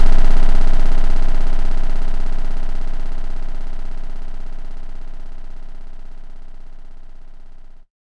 01 C0 sine freehand
Some C0 16.35Hz sine drawed in audacity with mouse hand free with no correction of the irregularities, looping, an envelope drawed manually as well, like for the original graphical Pixel Art Obscur principles, except some slight eq filtering.
audacity, beep, C0, computer, do, electronic, experimental, glitch, handfree, harsh, lo-fi, mouse, noise, sine, sine-wave, ut, waveform